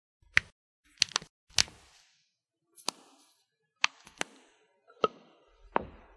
Knuckles Cracking
152, crack, cracking, GARCIA, joints, knuckles, MUS, SAC